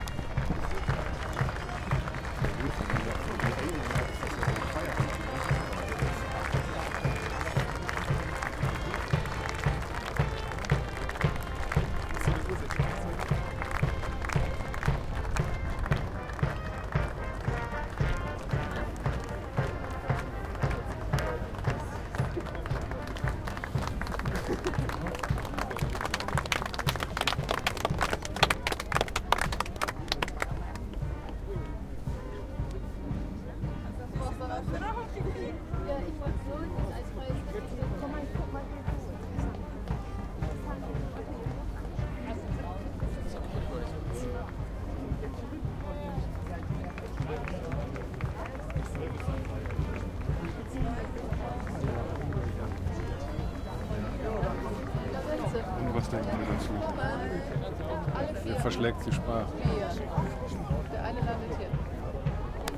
STE-011 minden parade scotland the brave
stereo field recording at minden weser riverside during the "250 years battle of minden" festival. a marching band passes over, voices of people all around. beginning of the historical staging of minden battle at the historical place at the banks of river weser. event recorded with zoom h2. no postproduction.
marching, spectators, military